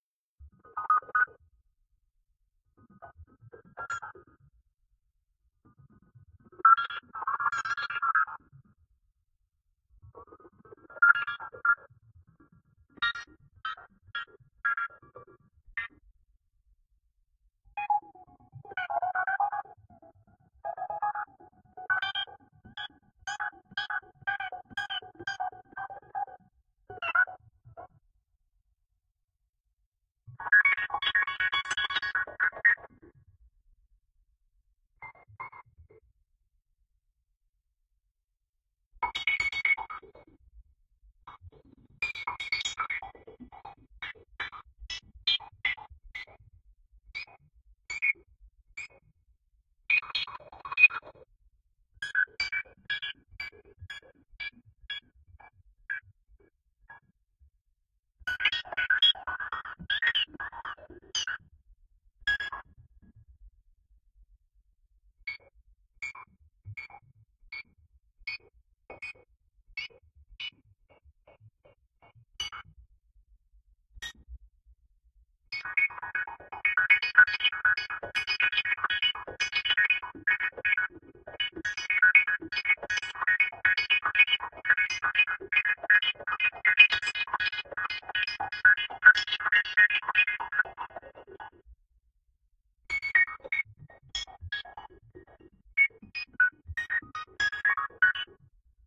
Just pressing random notes on a piano run through a filter gate. Could be used for some sort of alien sound effect or something.